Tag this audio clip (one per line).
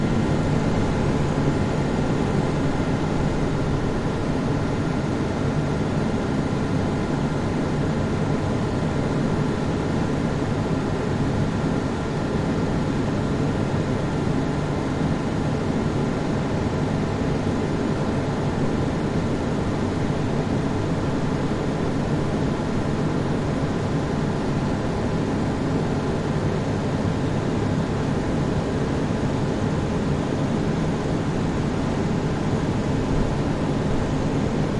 ambiance
atmosphere
atmos
soundscape